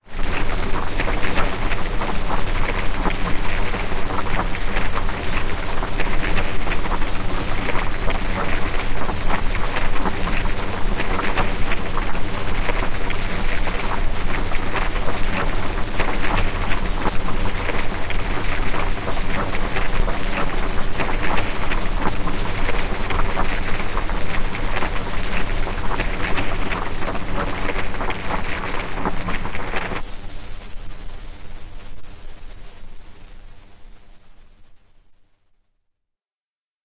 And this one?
It sounds like strong fire burning.